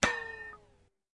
Ricochet metal
bang, crack, gun, metal, ping, pow, ricochet, shoot, snap, ting, wood